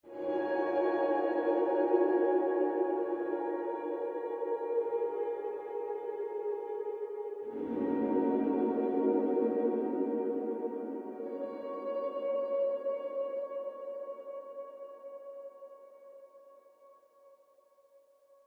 KFA5 130BPM
A collection of pads and atmospheres created with an H4N Zoom Recorder and Ableton Live
atmospheric, pad, euphoric, distance, electronica, warm, melodic, calm, far, polyphonic, soft, ambience, chillout, spacey, chillwave